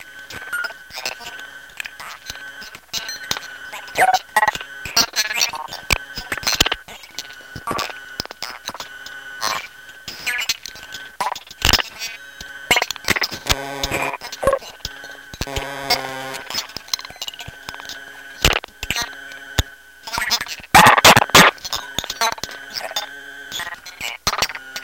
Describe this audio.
Freya a speak and math. Some hardware processing.